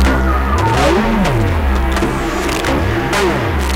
Nightmare tripod walker